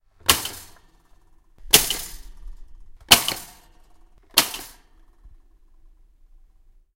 Toaster Pop, A
Raw audio of a toaster popping recorded from four different perspectives.
An example of how you might credit is by putting this in the description/credits:
The sound was recorded using a "H1 Zoom V2 recorder" on 17th April 2016.
Pop, Popping, Release, Toast, Toaster